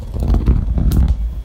Melon rolling on floor
rolling, melon